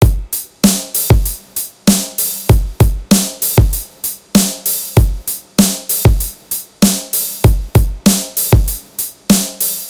Motif drums loop 97bpm
A motif hip hop drum loop with a little distortion and reverb, Wave, 97 bpm
97, reverb, bpm